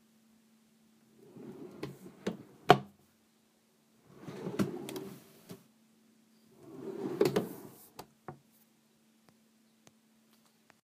Drawer Opening Closing
Sliding a drawer open and close.
Bedroom, Close, Drawer, Open, Slide, Sliding